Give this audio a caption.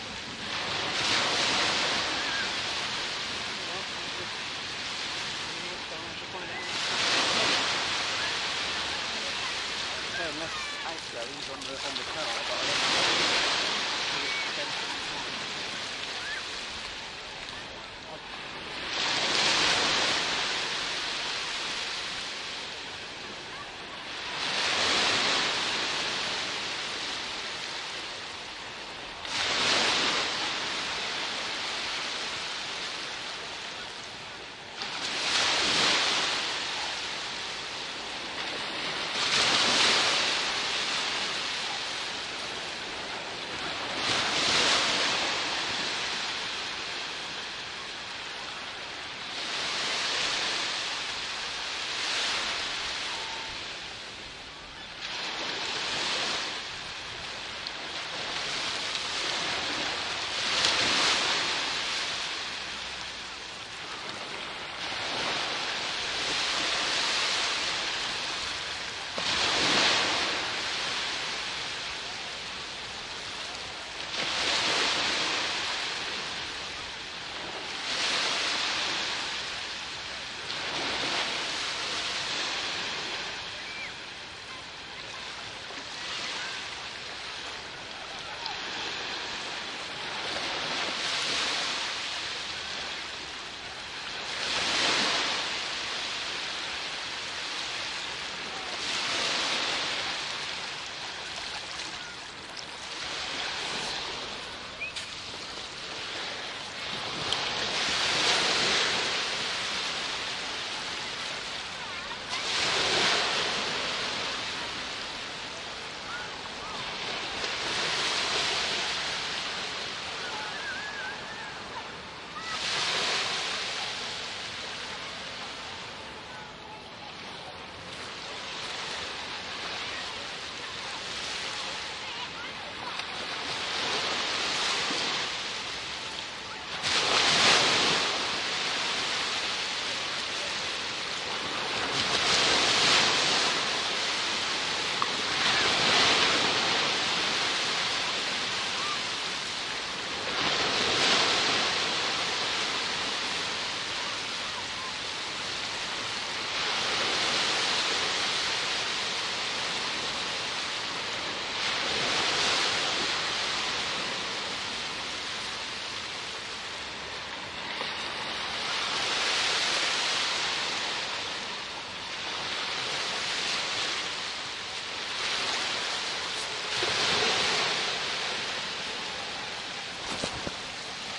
Beach Near Dusk#4
This is the sound of Weymouth beach approaching dusk, recording the sea gently encroaching further inward.
beach, coast, sand, seaside, shore, waves